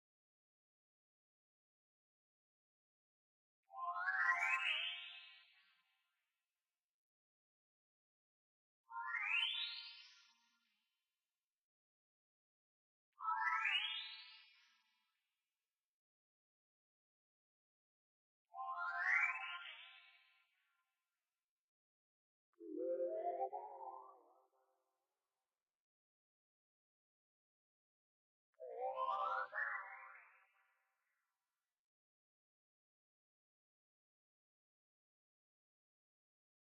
Magical FX for transitions. Made with iris and self recorded sounds.
Made it for a Podcast as a teleportation FX
transition, Abstract, Electronic, Magical